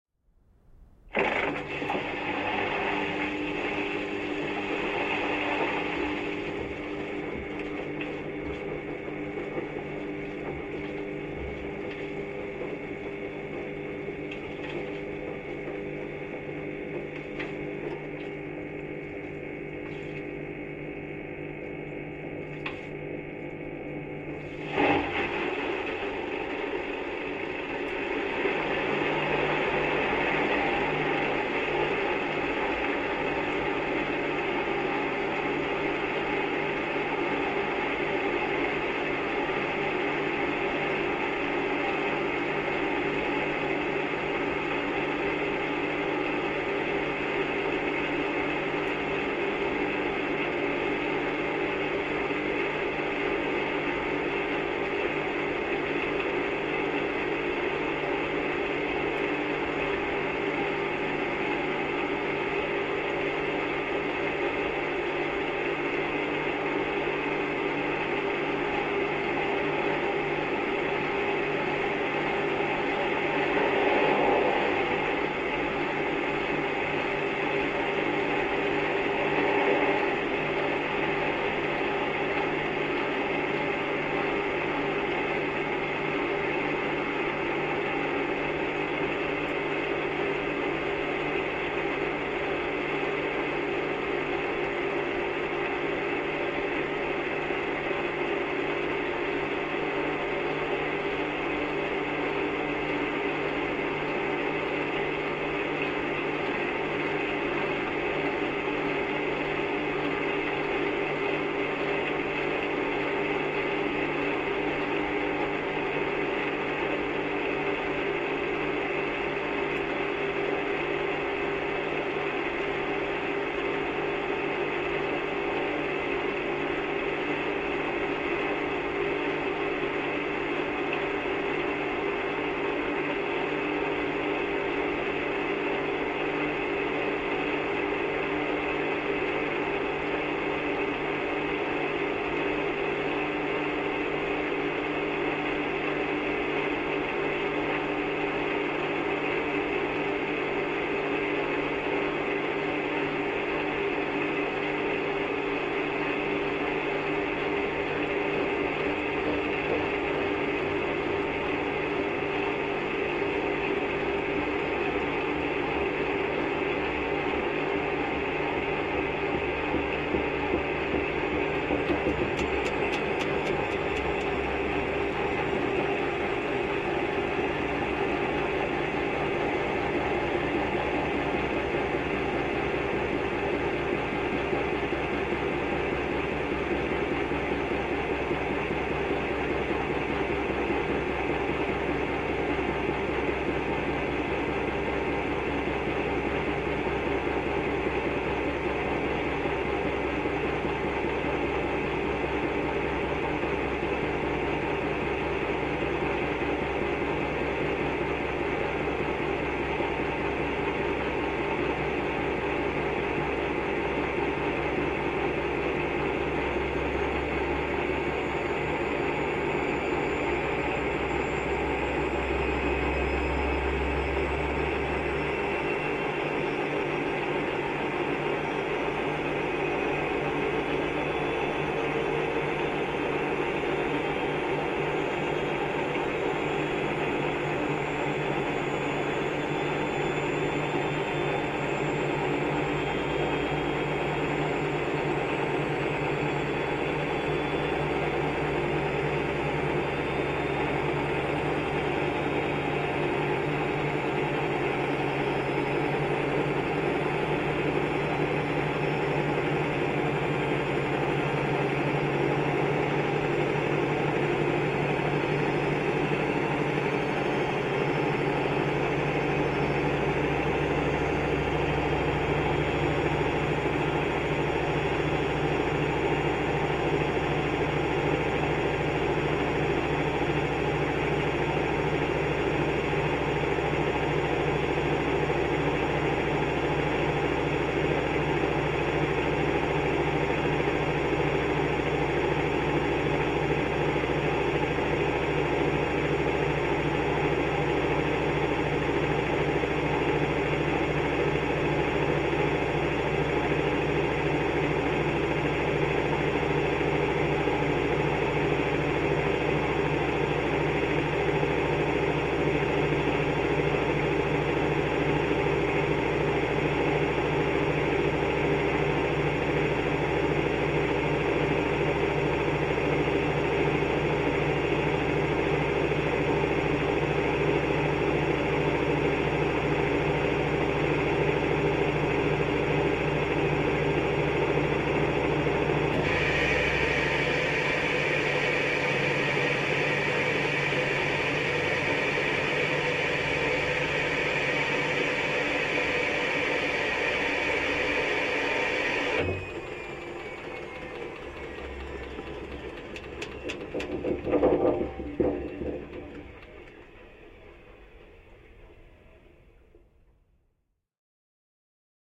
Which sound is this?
Washing Machine Empty and Spin (contact mic)
Washing machine emptying out and doing a spin cycle, recorded with a contact mic.
Mono, contact mic (JrF)
contact-mic, spin-cycle, washing-machine